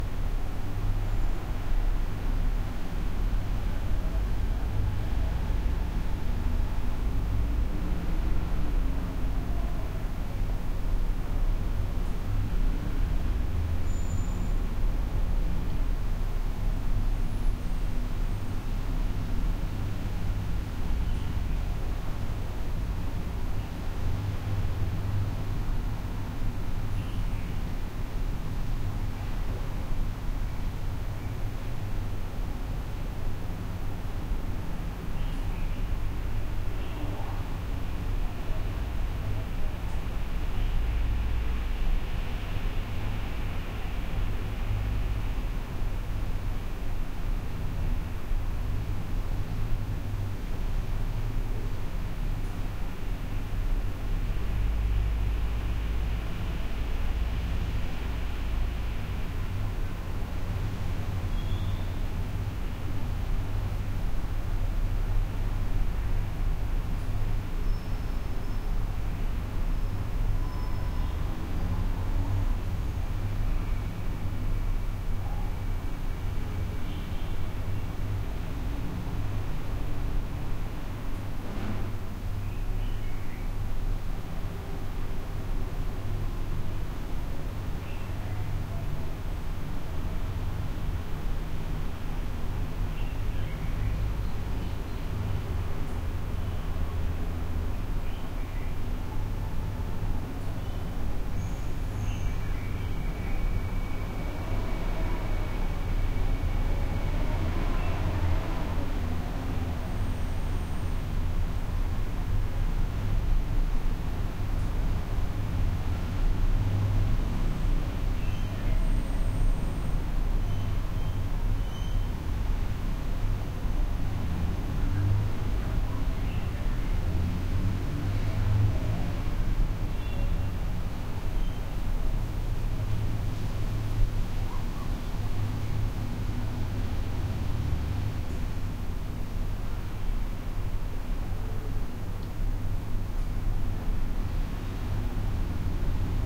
room tone small noisy distant traffic through partially open window resonate in plaster box room Kampala, Uganda, Africa 2016
Uganda, room, traffic, small, noisy, distant, Africa, tone